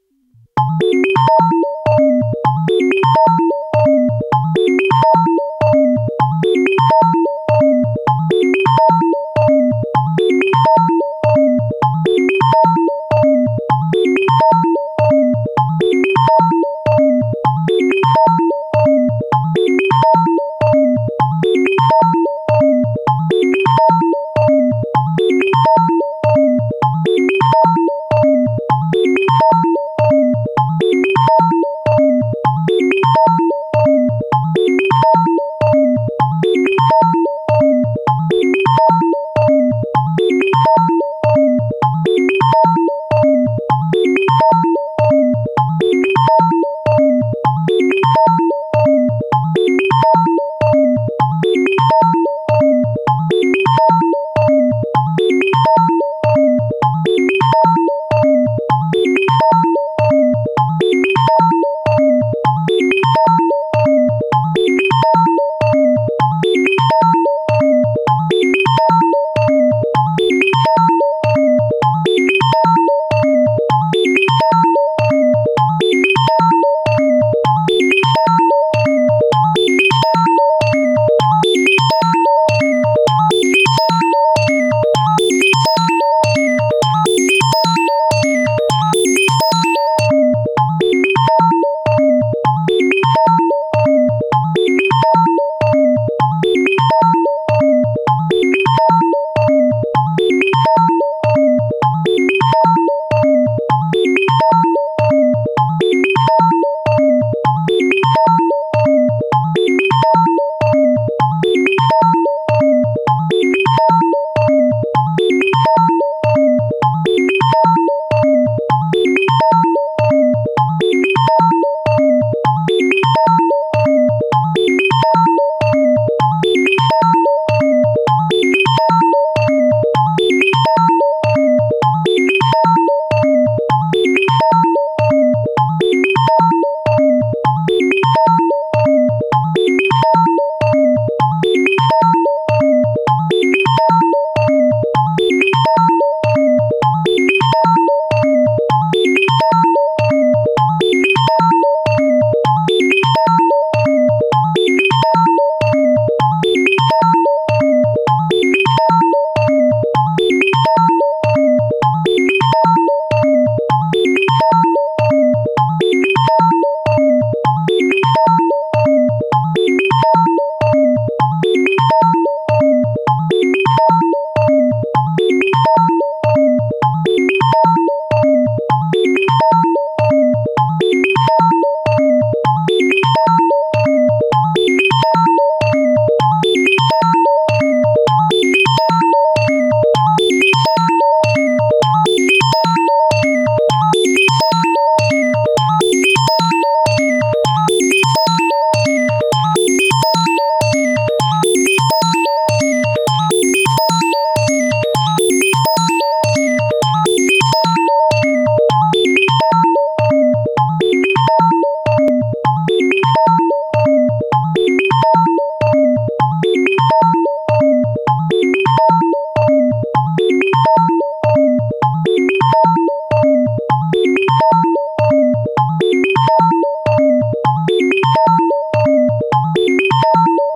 Unused Sequence that I recorded using my modular analog synth